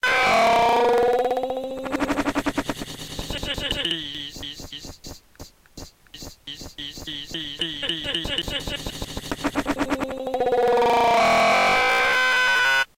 tcm-racing-join glitched
a glitched version of some of the audio i made thats for if you join a multiplayer room.